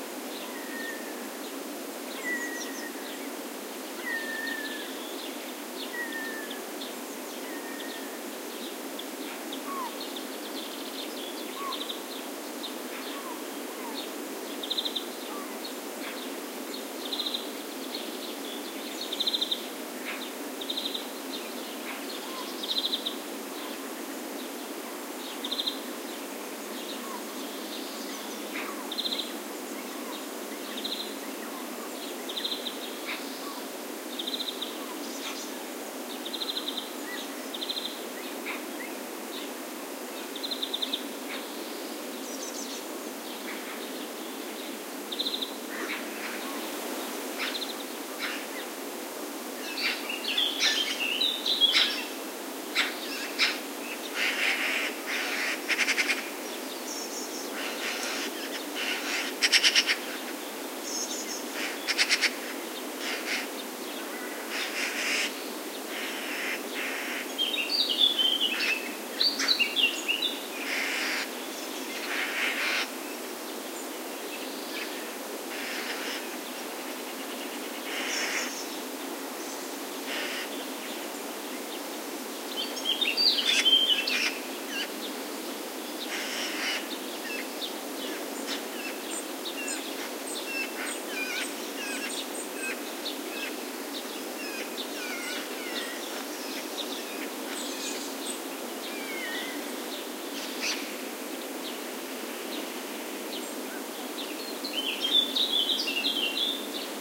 birds quiet morning
This is a mostly quiet atmosphere. Close-up Greenfinch, Garden Warbler and European Magpie calls with other birds in background. Recorded with a Zoom H2.